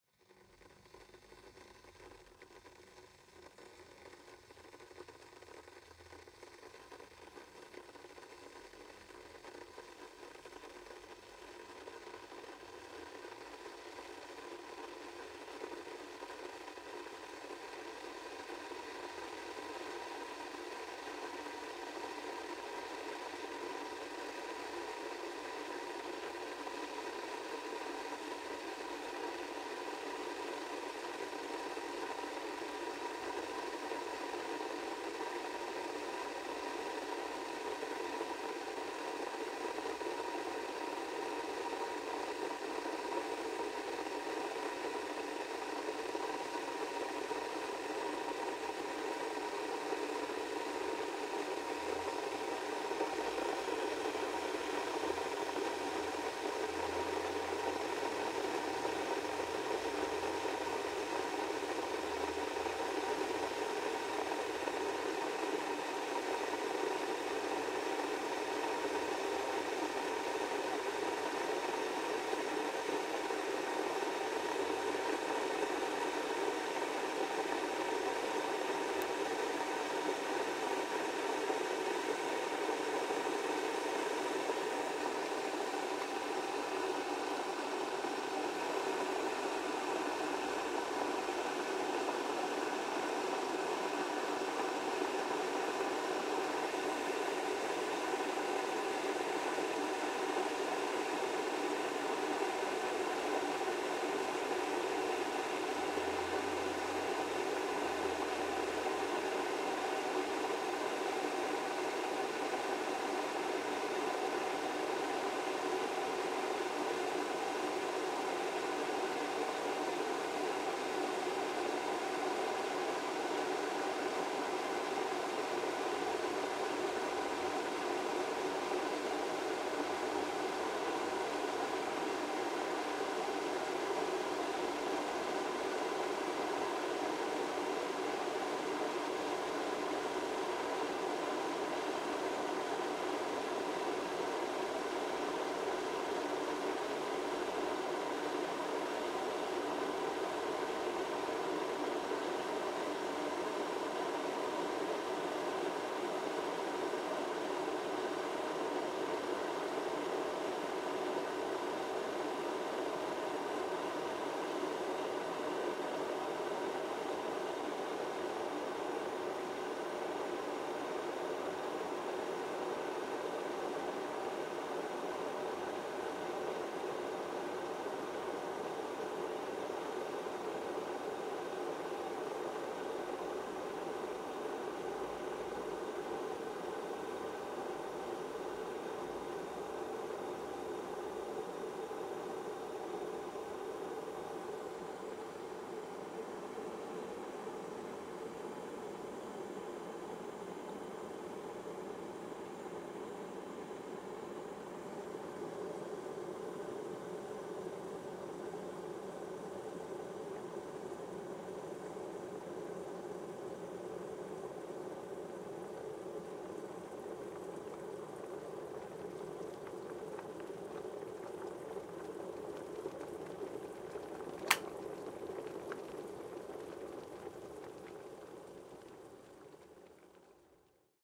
Kettle Cycle
The kettle I have at home, going through a cycle until it switches off automatically when it reaches boiling level.
I recorded it with my Sony PCM-M10. Hope you find it useful!
Boiler, Bubbles, Bubbly, Kettle, Switch-Off, Water